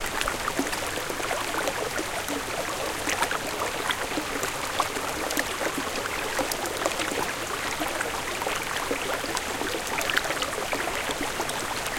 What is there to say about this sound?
GLUCKERN 12SEK
babbling, brook, creek, flow, gurgle, liquid, relaxing, river, stream, trickle, water